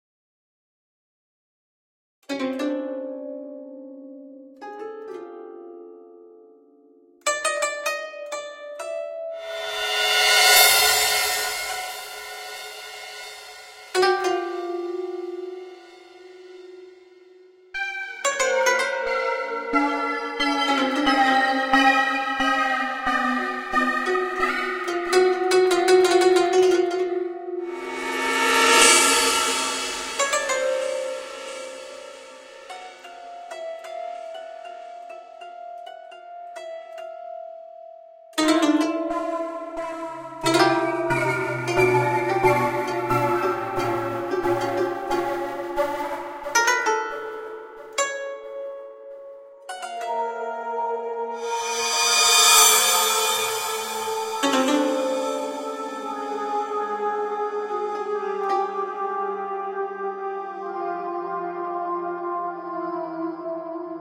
Scary sounds
Something I did for a show called Victors Crypt. It's basically some strings and sounds in very random order creating a spooky vibe. No melodies or such, just a freaky atmosphere. It might be great for something strange and eerie. Maybe something Sci-Fi or anything a bit strange. Hope you like it and make a use out of it.